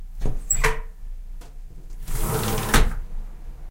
small window C
close, opening, opens, window